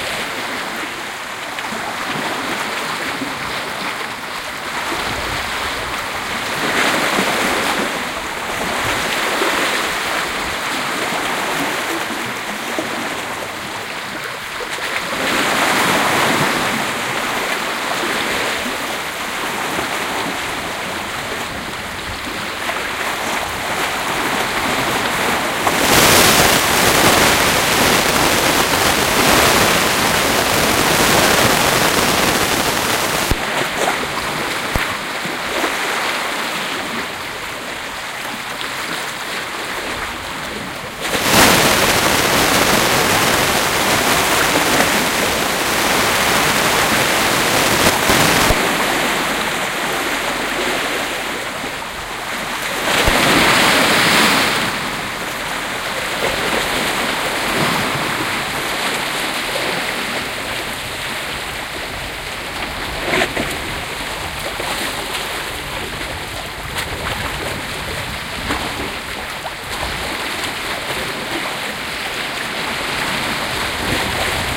Montrose beach
This recording was made on a sunny day in April on the east coast of Scotland at a place called Montrose, using the Sony HiMD MiniDisc Recorder MZ-NH 1 in the PCM mode and the Soundman OKM II with the A 3 Adapter.
binaural, field-recording, oceansurf, scotland, waves